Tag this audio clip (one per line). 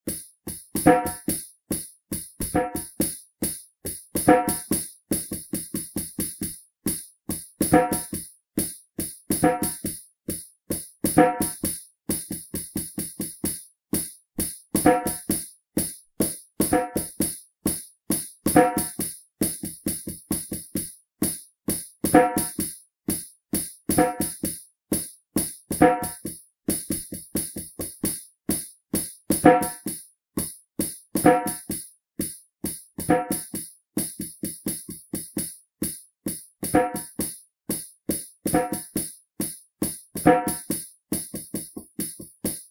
loop
india
indian
African
djembe
hand
drum
handdrum
Africa
traditional
tabla